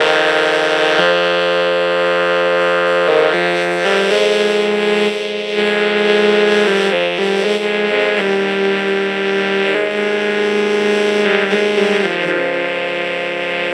derived from a song about Francois, he is someone's cat :)
funny, vocoder